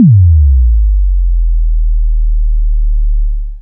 This is a simple but nice Bass-Drop. I hope, you like it and find it useful.
bassdrop, deep, bass, low, drop, sample, frequency, sine, bass-drop, low-frequency